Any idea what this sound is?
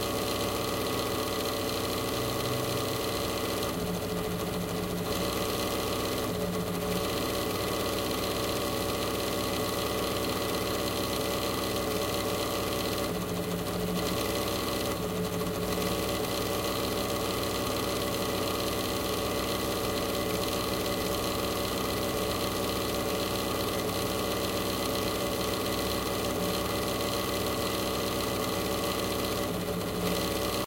broken pc cooler 02
My broken pc-cooler (not longer in use)
pc-cooler, broken, computer